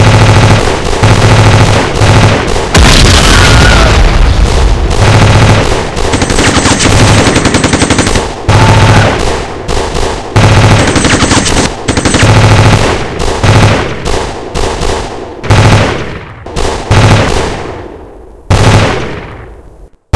Gun Battle Long
AK47, Auto, Automatic, Gun-Battle, Gunshot, M16, Machine-Gun, Pistol, Rifle, Weapon-fire